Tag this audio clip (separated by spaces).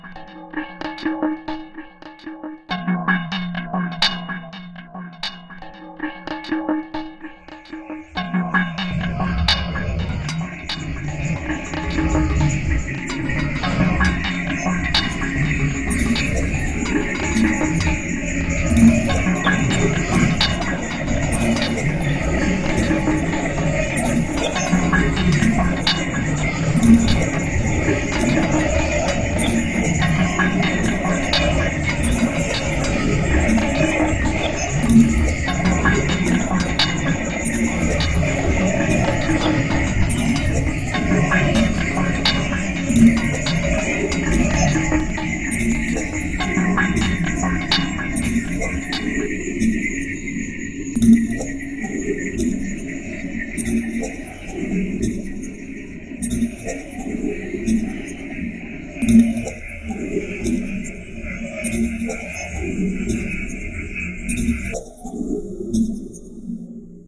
sound voice Stick fx Air drumstick delight looping muzak Schizoid Noise tambour